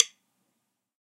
Drumsticks [Pro Mark L.A. Special 5BN (hickory)] №3.
Samples of these different types of hickory drumsticks was recorded with Reaper and M-Audio FireWire 410 sound card.
All these sounds was picked-upped by AKG D5 microphone.
1. Pro Mark L.A. Special DC (March sticks);
2. Pro Mark L.A. Special 5A (hickory);
3. Lutner Woodtip 7B (hickory);
4. Pro Mark L.A. Special 5B (hickory);
5. Lutner Rock N (hickory);
6. Lutner 2BN (hickory);
7. Pro Mark L.A. Special 5BN (hickory);
8. Pro Mark L.A. Special 2BN (hickory);
9. Pro Mark L.A. Special 2B (hickory);
10. Lutner 5A (hickory).
2B, 2BN, 5A, 7B, A, blocks, clicks, DC, drum, drumsticks, hickory, L, Lutner, March, Mark, metronome, nylon, Pro, Pro-Mark, ProMark, RockN, samples, Special, sticks, tips, wood